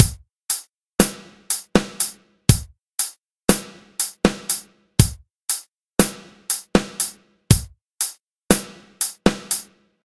A drum pattern in 5/4 time. Decided to make an entire pack up. Any more patterns I do after these will go into a separate drum patterns pack.
4, kit, drum, 5-4, 5, pattern, full